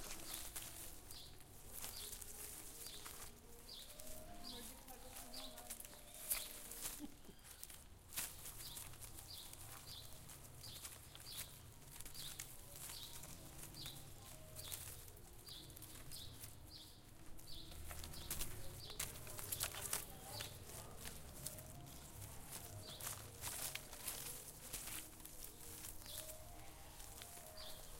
Arbusto balançando / Shaking a bush
Arbusto balançando, gravado com um Zoom H4 no pátio do Centro de Artes da UFPel.
A bush shaking, recorded with a Zoom H4 in the courtyard of Centro de Artes of UFPel.